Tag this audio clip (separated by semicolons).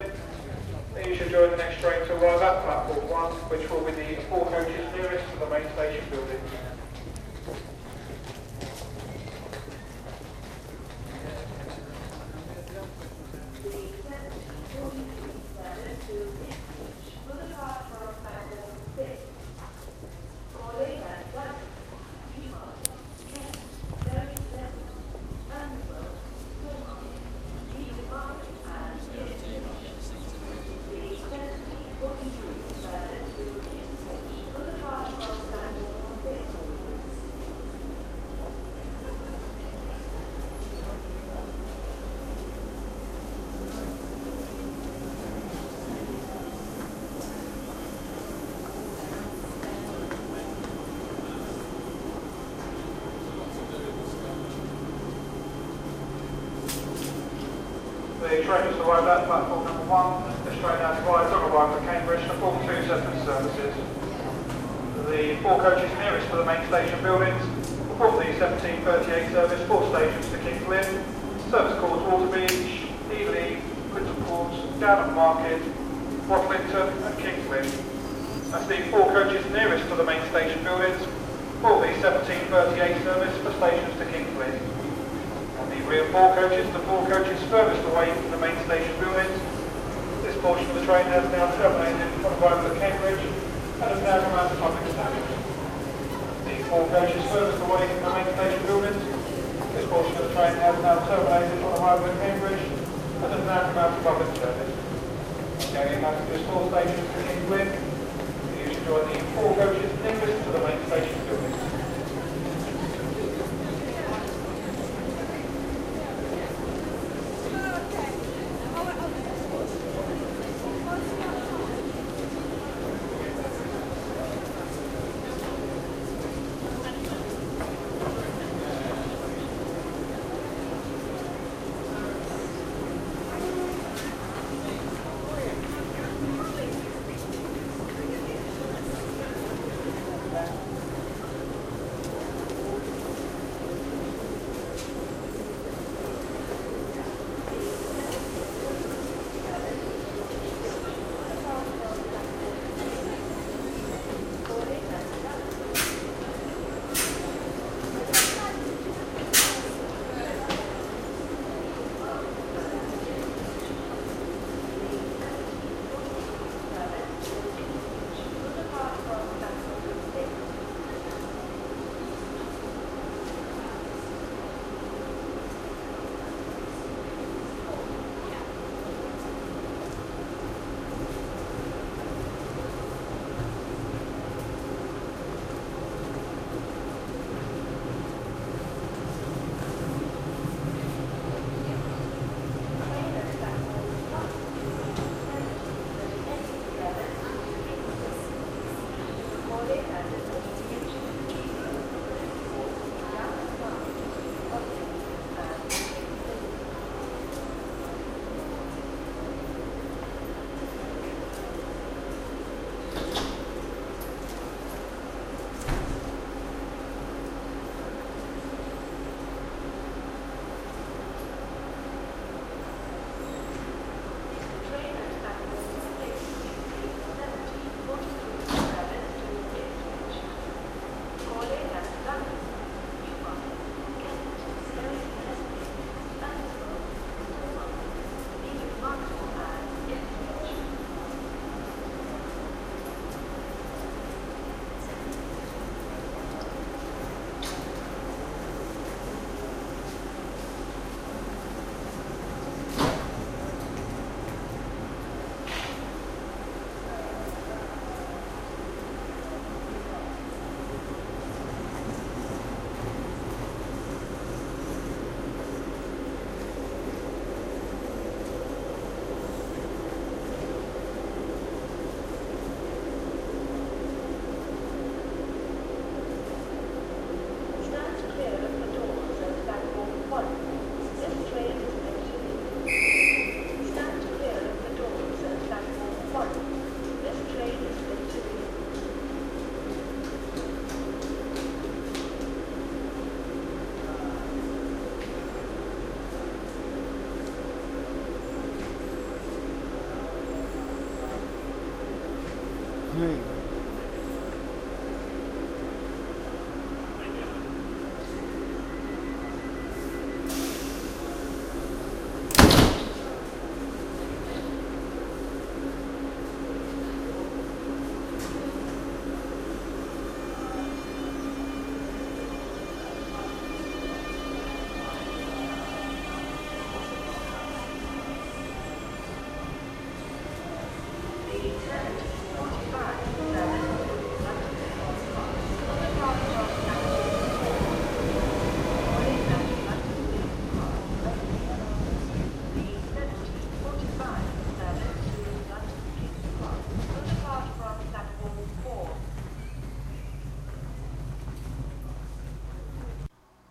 departing; railroad; station; train